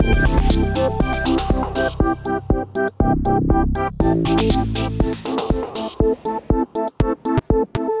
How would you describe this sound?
120 happy-techno
Happy melodic light drums electronic synth loop (120 bpm)